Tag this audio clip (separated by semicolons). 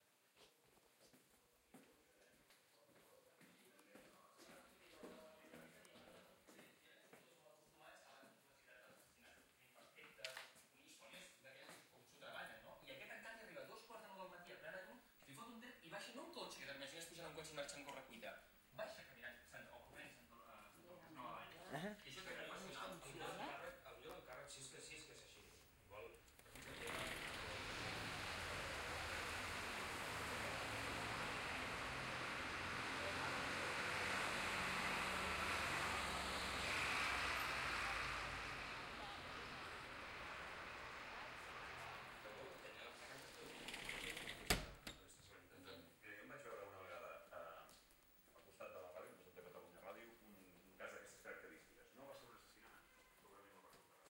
barcelona; binaural; bus; grandmother; street